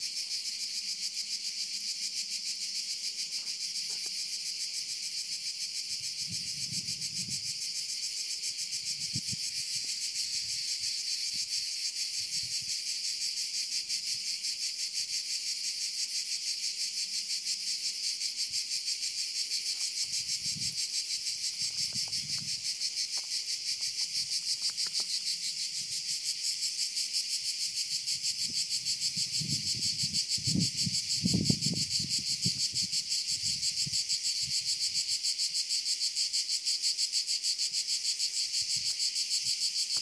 Cicadas al Corbera d´Ebre